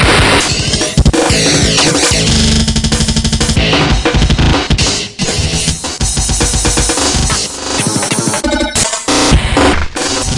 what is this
BR sequenced [guitar] (1)
One of the sequenced sections of percussion, unaccompanied.
breakcore, beats, fast